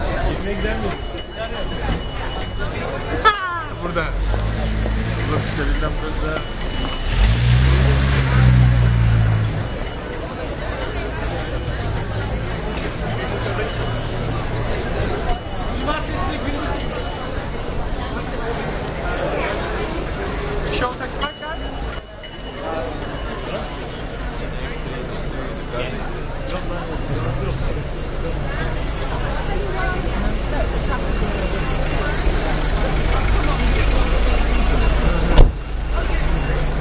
Covent Garden - Taxi Bike Bells 2